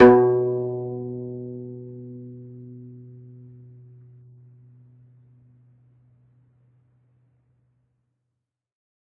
single string plucked medium-loud with finger, allowed to decay. this is string 7 of 23, pitch B2 (124 Hz).
acoustic, flickr, guzheng, kayageum, kayagum, koto, pluck, string, zheng, zither